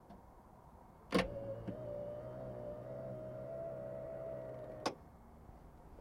53-2 trunk closed
Lincoln towncar trunk closed. Motorized fastener pulls the trunk closed. Recorded with cheap condenser microphone onto a Sony MD recorder.
electric, field-recording, machine, car